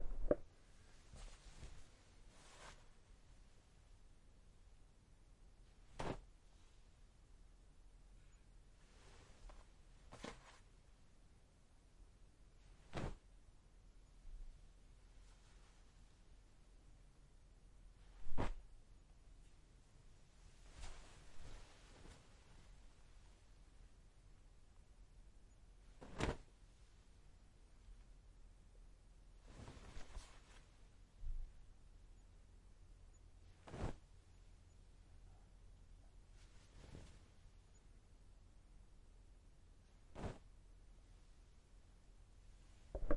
clothes thrown on wooden floor. Zoom H6n and sennheiser mkh 60